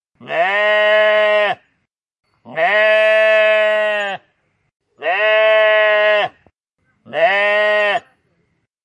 A loud sheep bah
bah, farm, goat, sheep